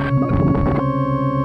minor second organ burble
Casio CA110 circuit bent and fed into mic input on Mac. Trimmed with Audacity. No effects.
Bent, CA110, Casio, Circuit, Hooter, Table